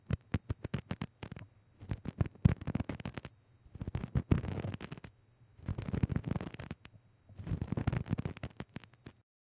etl finger surface b 48
Finger on foam, a texture. (2)